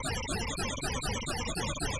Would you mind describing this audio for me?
Another batch of space sounds more suitable for building melodies, looping etc. Stuttering sequence.
space, loop, musical, sequence, sound